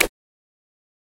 Menu sound 5
Sounds for a game menu.